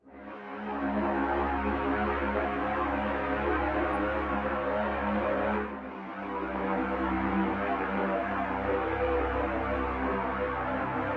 evil string
soundscape, ambient, string